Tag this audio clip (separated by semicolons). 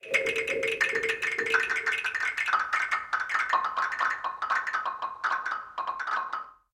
remix; claves; transformation